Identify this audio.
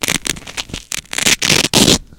Opening a cardboard lock which was fastened on a textile
cloth, textile, fabric, clothing, clothes